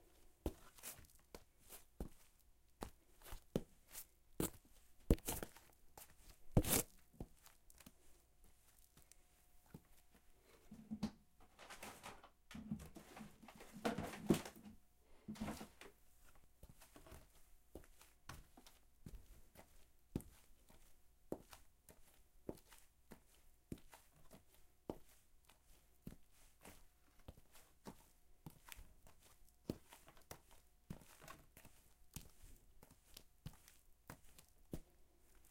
Walking on slightly crunchy road